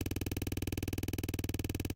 UI, Mechanical, Text-Scroll, 03, FX, 01, LOOP
An artificially designed user interface sound with a mechanical aesthetic from my "UI Mechanical" sound library. It was created from various combinations of switches, levers, buttons, machines, printers and other mechanical tools.
An example of how you might credit is by putting this in the description/credits:
And for more awesome sounds, do please check out the full library or SFX store.
The sound was recorded using a "Zoom H6 (XY) recorder" and created in Cubase in January 2019.
text, scroll, machine, dialogue